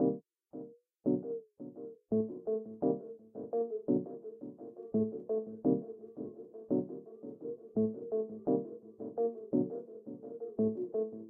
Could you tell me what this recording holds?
85 - another synth
It´s an classic Synth made with a Flanging Delay. It was made in Bitwig Studio by using Absynth 5. The Tempo is at 85 bpm, also usable like a Loop - like the most of my Sounds
Chords, Flanger, Synth